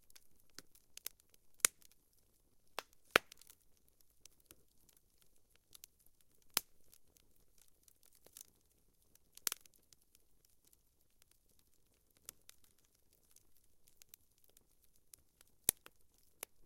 fire crackling, fireplace, BBQ
Small fireplace for BBQ. Natural wood burning and crackling.